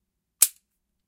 Pulling the trigger on a revolver (dry fire). recorded with a Roland R-05